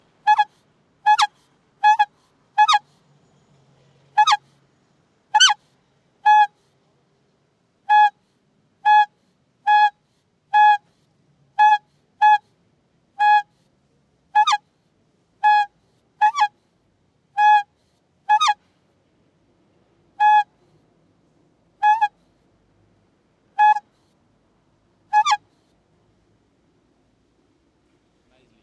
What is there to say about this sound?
TESIS PROJECT-T020 Tr1Trompeta bicicleta #2
tromp, city, bocina, field-recording, urban, town